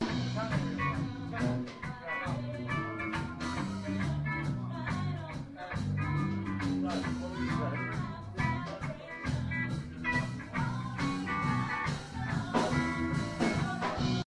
Clip of a band performing in a room next to us, captured from outside of the room.
ambient, band, door, live, next, room, small, unprocessed